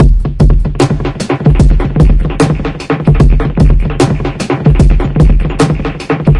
Zajo loop33 dudwaste
remix of a downtempo beat added by Zajo (see remix link above)
delay, distorsion and compression